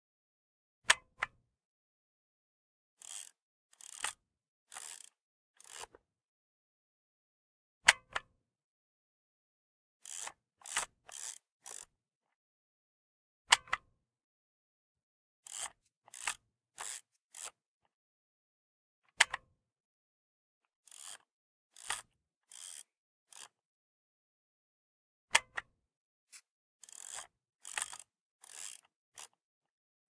Foley Kodak K500 taking pictures & forwarding film
A nice wide variety of foley effects for my 101 Sound FX Collection.